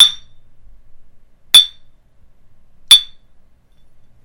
Bottles clinking with a bit of room reverberation
Percussive, Bottle, Clink